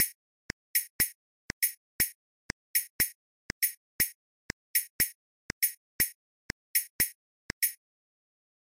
Sincopa suave(ejercicio1)
Sonido con sincopa suave